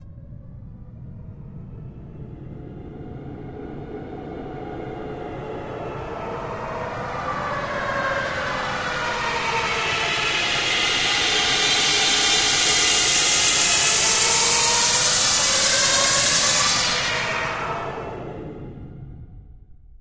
Sweep (Flanging and Phasing)
White noise sweep, put through a flanger and phaser. eo field, then pans out wide.
Sweep, Whoosh